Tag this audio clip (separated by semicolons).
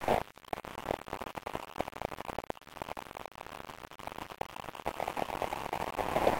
field; recording; noise; sample; ambient